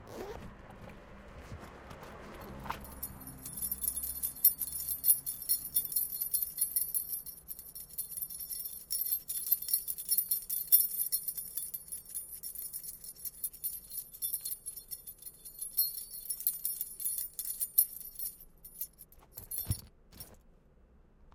080808 38 Zipper KeysRinging Street
ringing with keys around the recorder on the street